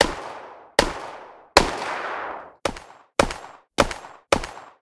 Shotgun Slight Mountain Reverb
Recording of a model-T shotgun with layers for impact. Compression applied in post.